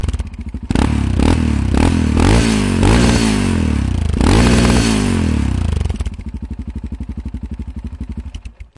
yamaha mt03 roar edhaust 1
Yamaha MT-03 2006 roaring, custom exhaust, compressed and some EQ for plenty of juice..
custom-exhaust, reving, engine, yamaha-mt-03, roar, motorbike